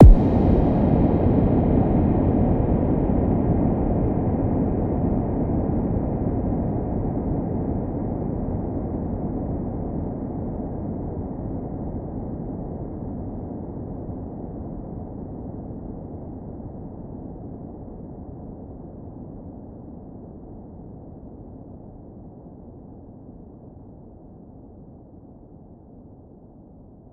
Big Reverb Kick
A kick Drum with a massive reverb tail